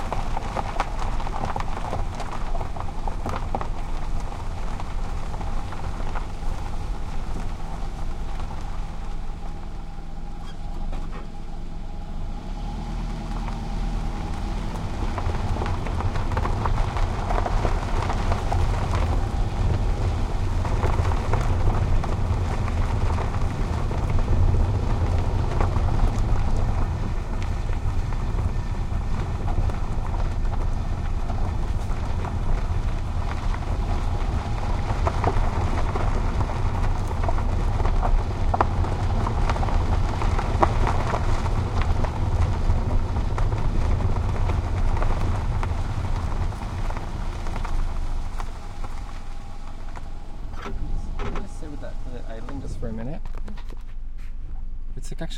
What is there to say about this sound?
car tire on gravel rear cu blyth 21 6 12
Slowly driving on gravel road, mic close to wheels, Blyth, Ontario (2012). Sony M10.